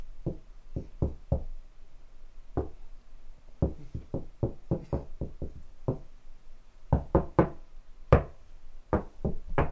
Knocking on a wall